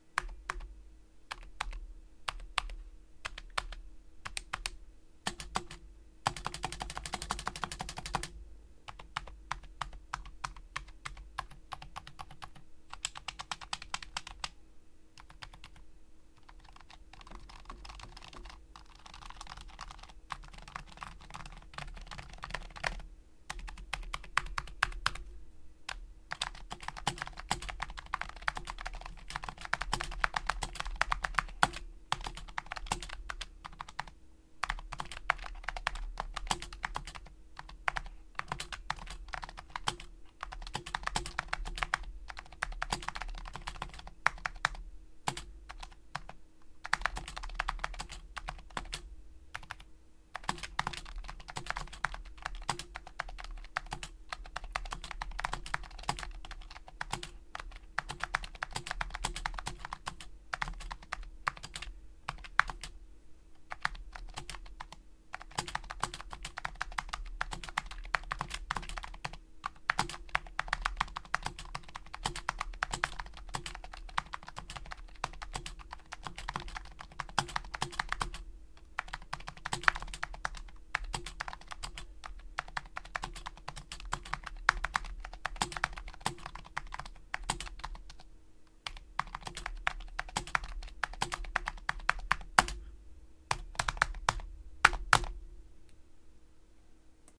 Remington Rand 1550 keyboard typing sounds
Typing sounds of the keyboard module from a Remington Rand 1550 typewriter. Keyboard uses SMK vintage discrete dome switches.
keyboard, keystroke, typewriter